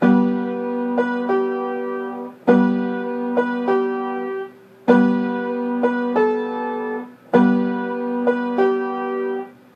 PIANO LOOP
A decent recording of me playing a piano. I think you will find it useful. Recorded with my iPhone with Voice Memos. Unedited, unprocessed, just clipped. Maybe you could remove the noise, ehhh... I didn't wanna edit it.
instrument, loop, music, piano, test